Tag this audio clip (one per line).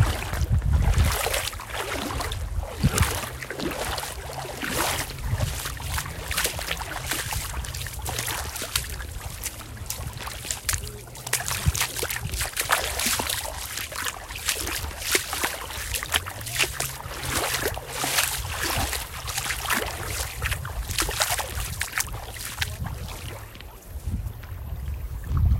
shore
water
footsteps
splash
ocean
beach